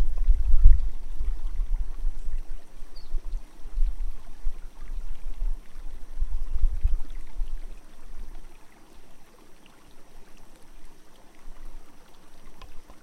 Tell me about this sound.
Caida de rio
Lo grabe en un rio cerca de UIO-Ecuador.